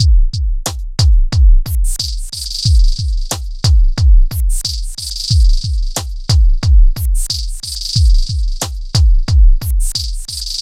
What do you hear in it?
He made the main percussion rhythm for the track. At some point I was messing with his loop to create some variations, and put a delay on that channel.
I decided then not to use the part and mutted that channel. When I was finishing the track I had completely forgotten about this rhythm and only found it again because I un-mutted the channel by mistake.
Uploaded here in case someone finds a use for it.